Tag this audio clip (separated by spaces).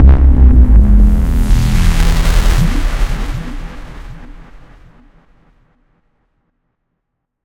Tension design effect Impact boomer title trailer cinematic game boom movie hit intro film video inception free